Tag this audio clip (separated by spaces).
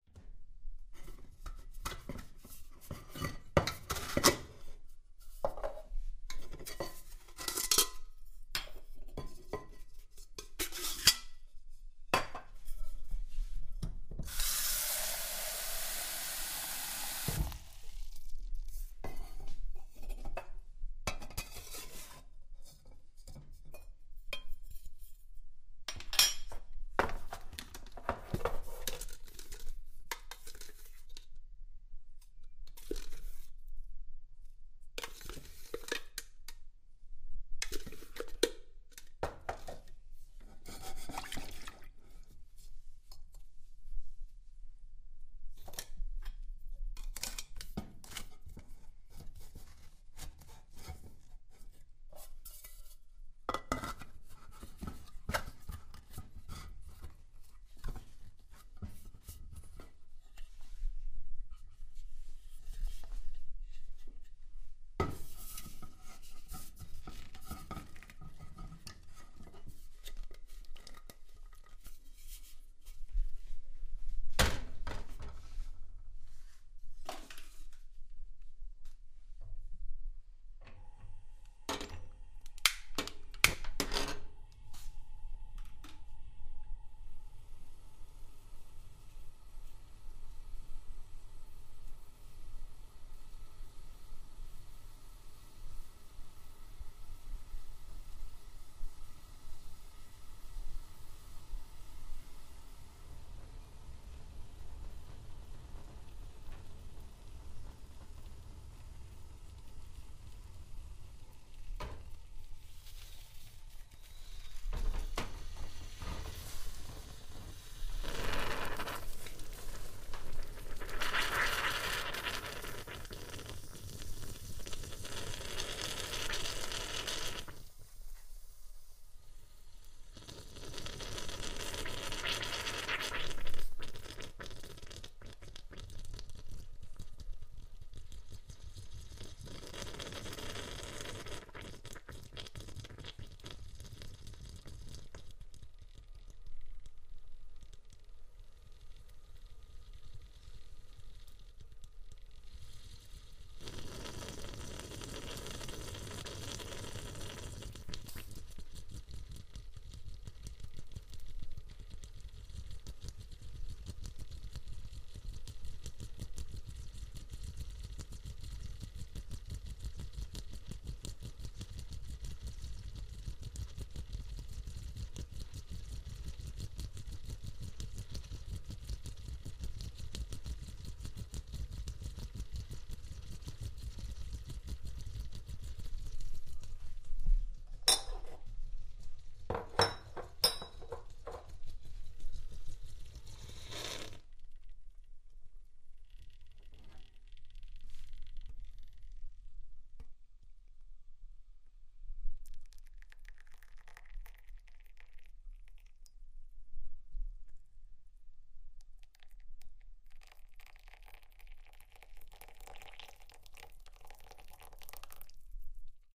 coffee machine coffee-machine nespresso